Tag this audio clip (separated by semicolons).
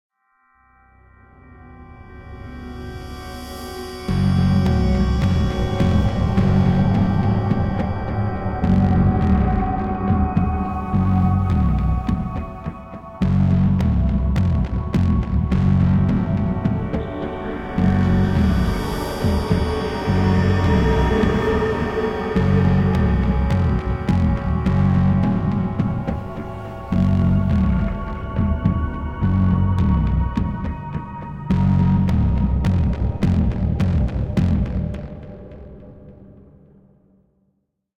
Alien; Soundscape; Scary; Dark; Game-Creation; Soundcluster; SF; Science-Fiction; Horror